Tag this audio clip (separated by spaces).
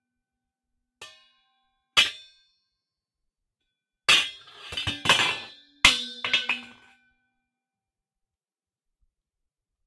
disches fall reberb pot